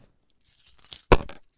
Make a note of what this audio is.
coin fall
fall, field-recording, coin, movement